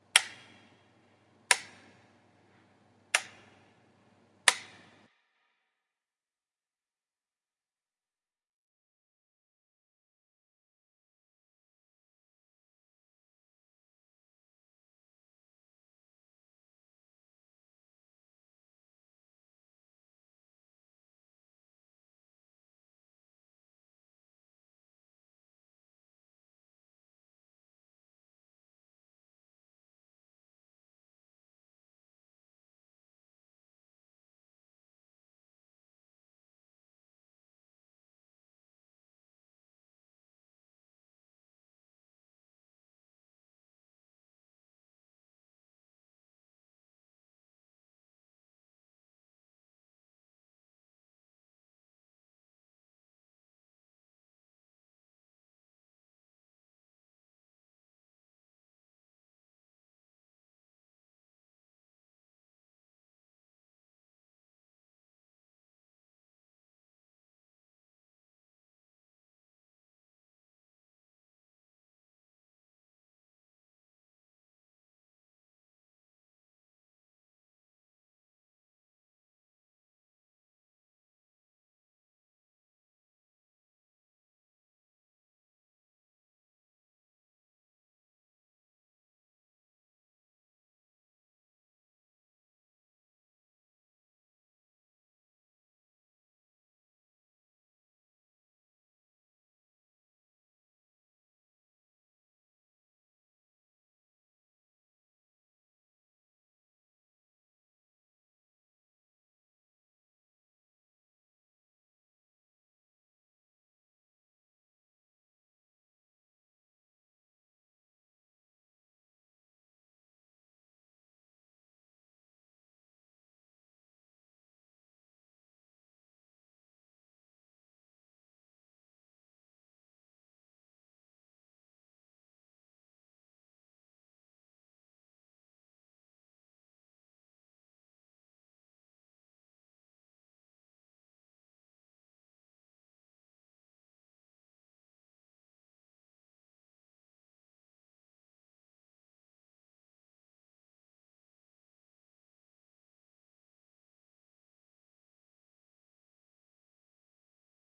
Remington 700 Safety
A Remington 700's Safety.
Action, Bolt, FX